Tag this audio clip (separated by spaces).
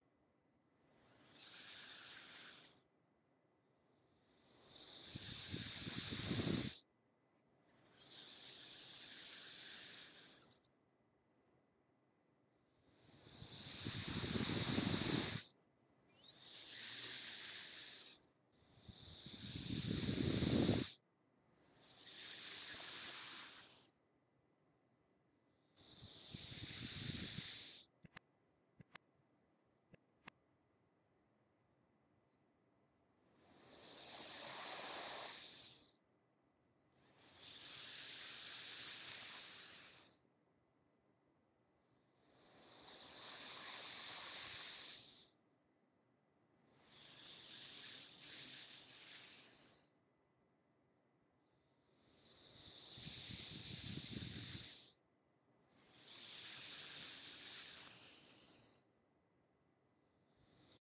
60second breathe breathing